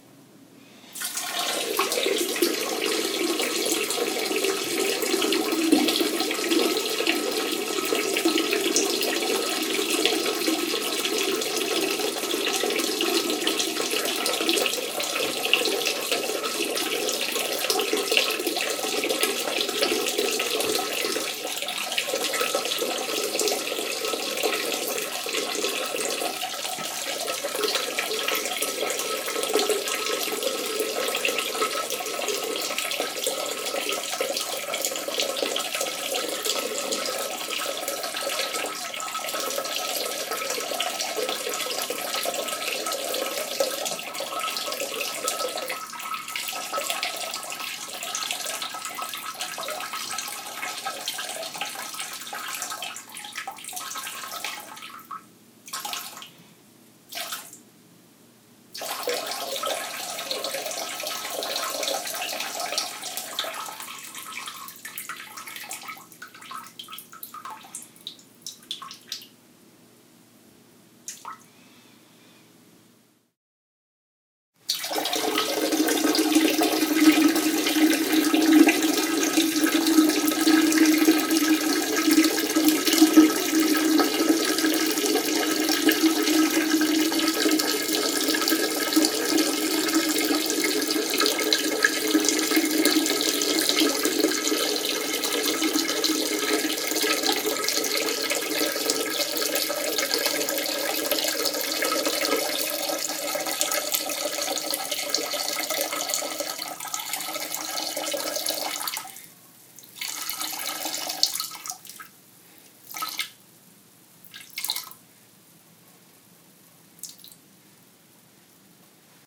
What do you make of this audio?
Male, urinate, piss into toilet bowl, 2x
Male long piss urinate into toilet bowl. I was drunk XD